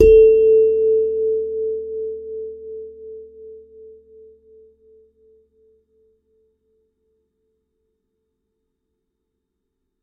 Sansula 06 A' 02 [RAW]
Nine raw and dirty samples of my lovely Hokema Sansula.
Probably used the Rode NT5 microphone.
Recorded in an untreated room..
Captured straight into NI's Maschine.
Enjoy!!!